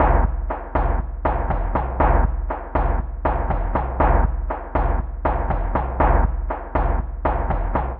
Electronic Filter Percussion 2 (120 BPM)
Electronic Percussion with Filter Mode. 120 BPM